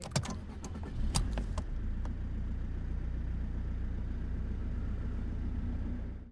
Engine On Fiat Panda 2007 Internal 02
2007, Panda, Engine, Internal, On, Fiat